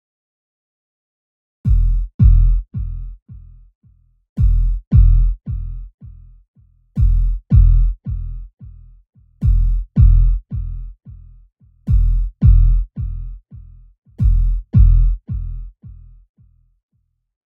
Futuristic System Alarm
Futuristic alarm with a little bass to it. Crispy and groooovy.
alarm, alien, computer, futuristic, robot, scifi, space, system